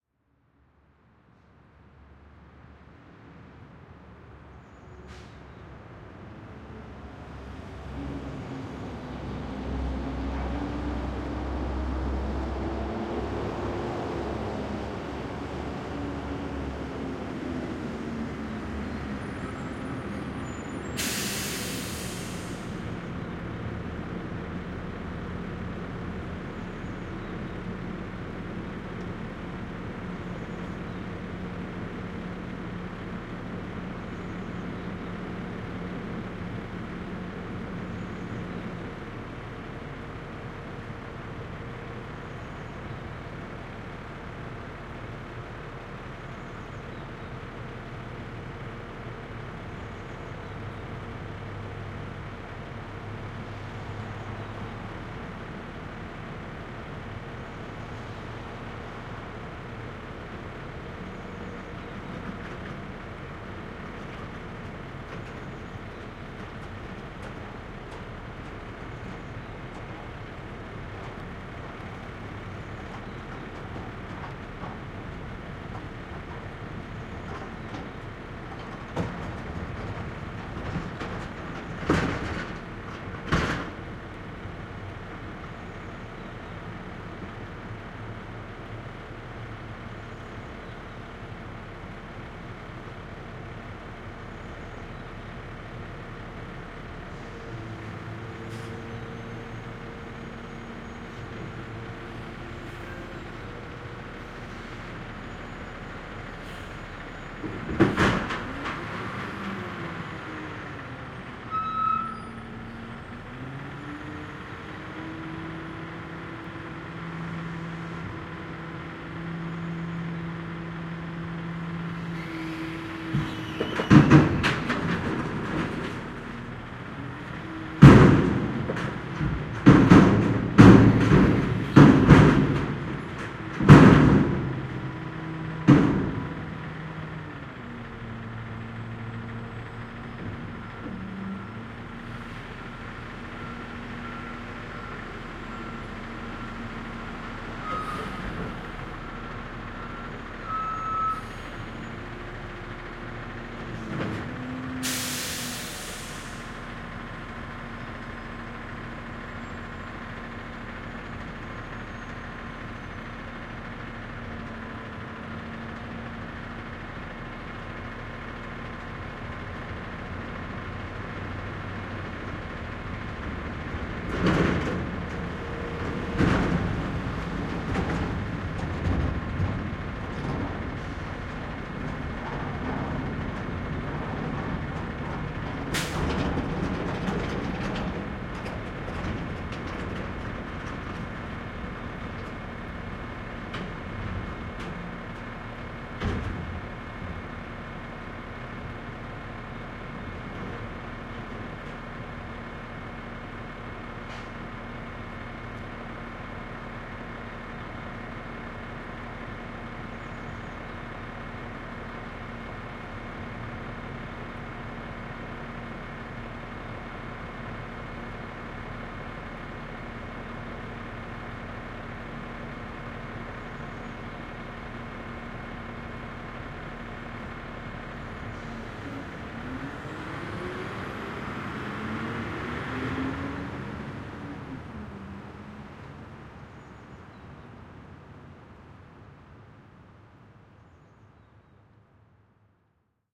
VEHMisc garbage truck collecting dumpster tk SASSMKH8020
A truck arrives to pick up the garbage/recycling. It empties the bins and then drives away.
Microphones: Sennheiser MKH 8020 in SASS
Recorder: Sound Devices 702t
crash, urban, metal, bang, engine, city, noise, truck, street, loud, garbage, life, field-recording